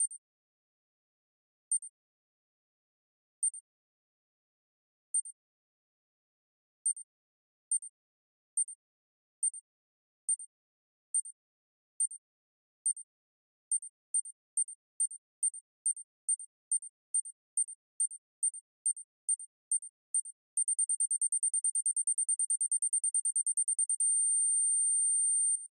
FX - Beep bomb countdown 3
Made with Synth-VST FM8, a simple beep sound for different purposes, like a bomb or any beeping things :)
Comments and ratings welcome :)
beeping, biep, bomb, countdown, FX